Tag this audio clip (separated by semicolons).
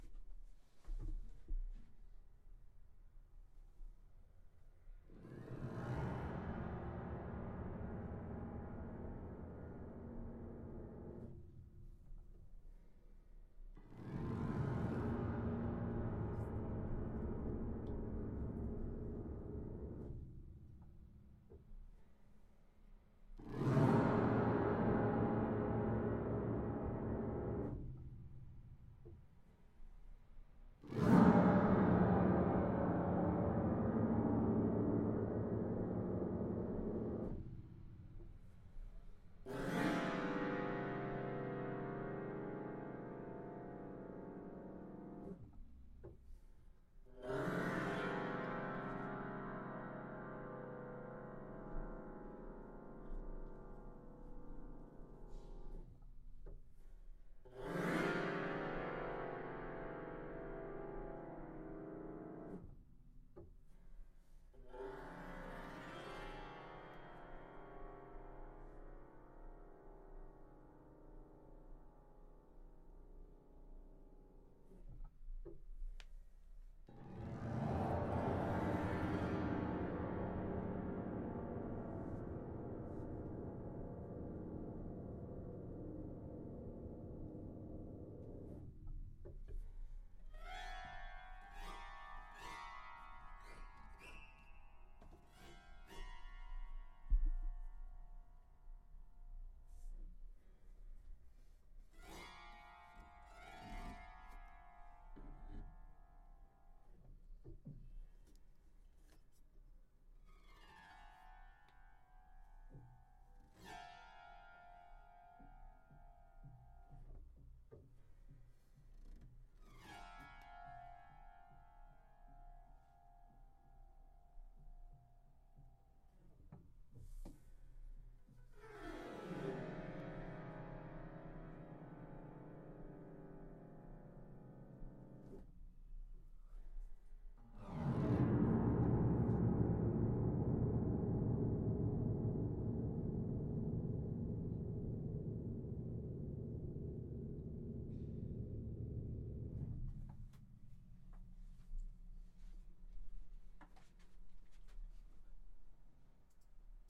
fx; glissando; horror; pedal; piano; scrape; string; sustain; sweep